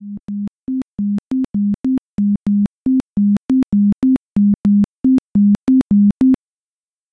I generate a sound F# 2 seconds. Then I created several silences in the track to the rhythms and sounds different (change the pitch of the sound). And I finally slowed down the tempo and accelerated speed. I selected it and I have made repeated 2 times.
pitch, speed, tempo